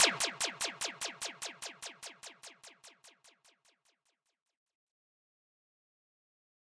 Made this myself in REAPER. I use this in every song I make as a little percussion, have fun
could also be used for a gun sound :)